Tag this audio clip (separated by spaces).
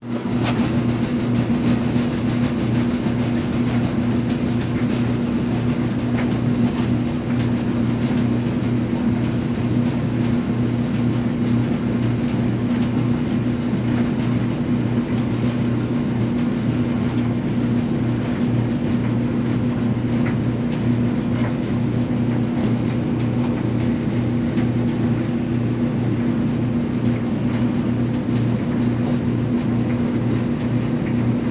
ambient buzz humm